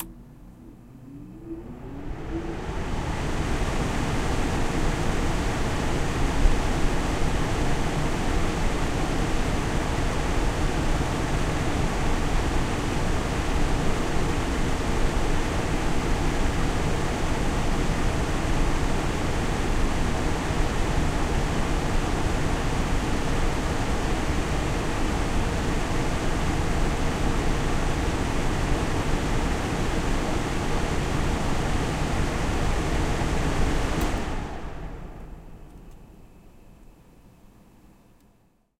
AC cycle w fan
My window air-conditioner on the fan setting. Fan turns on for a few secs and shuts off. Recorded on Yeti USB microphone on the stereo setting. Microphone was placed about 6 inches from the unit, right below the top vents where the air comes out. Some very low frequency rumble was attenuated slightly.
off, Fan, Stereo, AC, Air-conditioner, Close-up